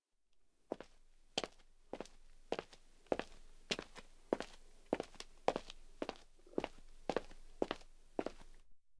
footsteps shoes walk road asphalt hard
recorded with Sony PCM-D50, Tascam DAP1 DAT with AT835 stereo mic, or Zoom H2
asphalt, walk, hard, road, shoes, footsteps